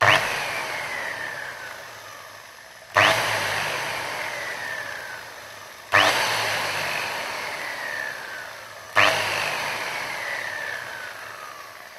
Hilti angle grinder 230mm (electric) turned on four times and slowing down.